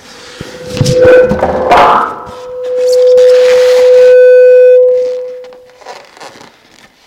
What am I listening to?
plastic feedback
unicel frotado, golpeado produciendo mucho feedback
rubbed, feedback, plastic, Unicel, rub